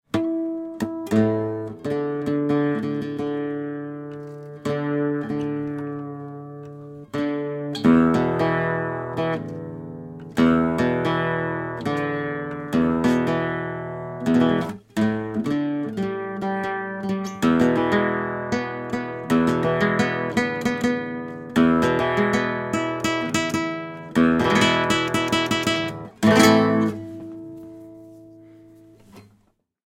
Flamenco Tune-Up
Flamenco guitarist tuning guitar between takes.
instrument, loop, strings, instrumental, guitar, stereo, flamenco